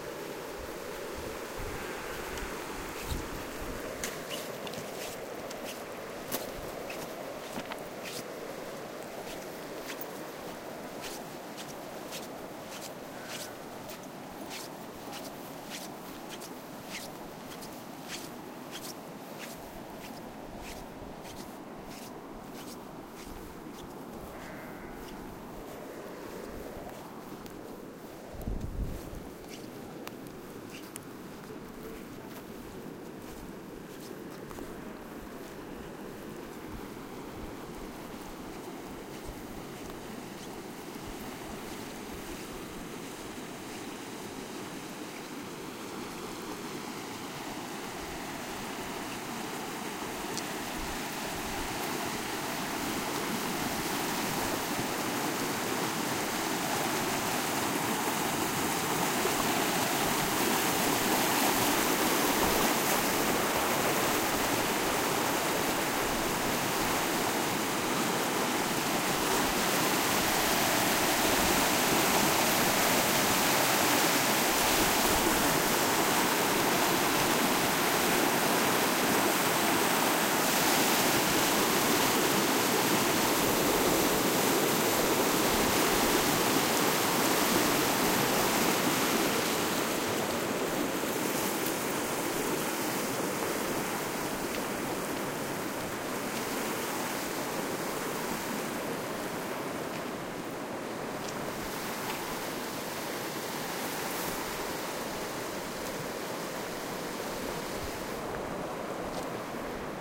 sound of a medium-sized stream near Tasiussak, Greenland. While I walk, the noise of my nylon trousers can also be heard. Some sheeps bleat in the distance.